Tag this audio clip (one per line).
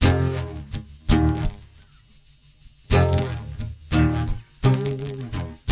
acoustic
beat
fraendi
gudmundsson
guitar
iceland
lalli
larus
loop
loopable
rock
rythm
strum